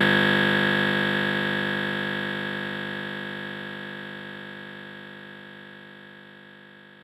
fdbck50xf49delay20ms
A 20 ms delay effect with strong feedback and applied to the sound of snapping ones fingers once.
cross, delay, echo, feedback, synthetic